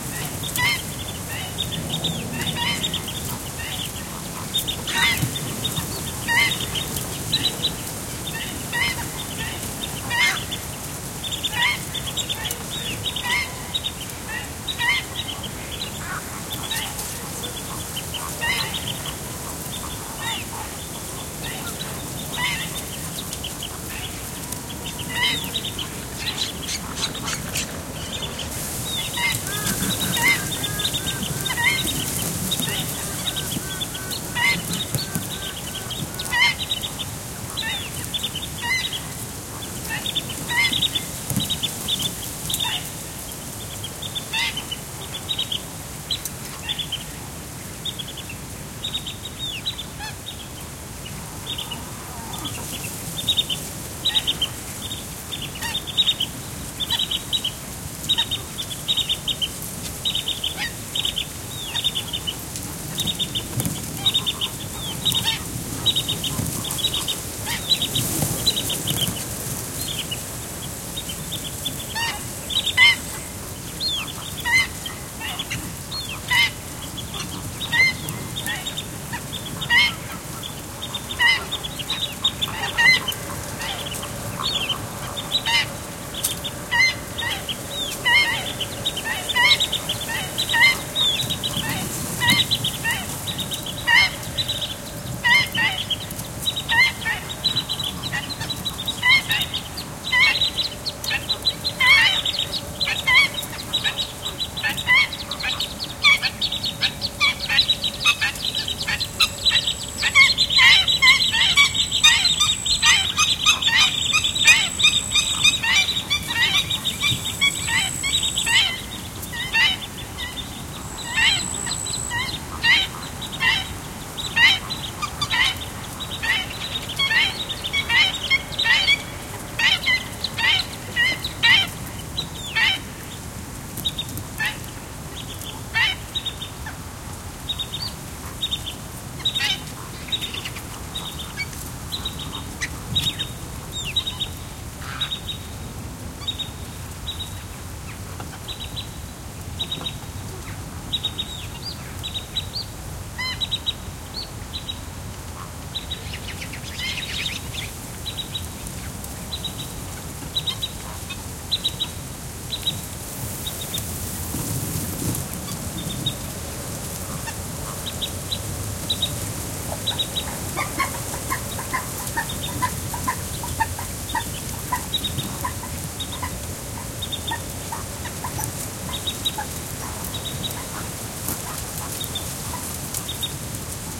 20180912.marsh.ambiance-084
Pond ambiance with bird callings (mostly Black-winged Stilt). EM172 Matched Stereo Pair (Clippy XLR, by FEL Communications Ltd) into Sound Devices Mixpre-3. Recorded near Dehesa de Abajo, Puebla del Rio (Sevilla, S Spain)
birds, black-winged-stilt, donana, field-recording, marshes, nature, south-spain, summer